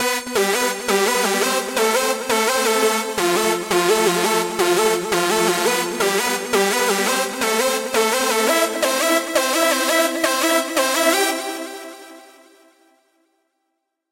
Riff 5 170BPM
longer synth riff loop for use in hardcore dance music such as uk hardcore and happy hardcore